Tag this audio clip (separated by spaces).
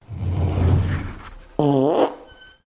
drawer closing wind gas fart farting close open